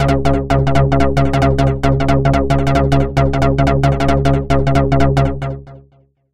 20 ca dnb layers
These are 175 bpm synth layers background music could be brought forward in your mix and used as a synth lead could be used with drum and bass.
background, bass, club, dance, drop, drum, dub-step, edm, effect, electro, electronic, fx, glitch-hop, house, layer, layers, lead, loop, multi, rave, sample, samples, sound, synth, tech, techno, trance